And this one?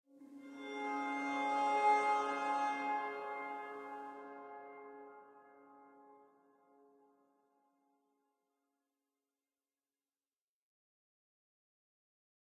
Bowed electic guitar - Dbma7 chord
Electric guitar played with a violin bow playing a Dbma7 chord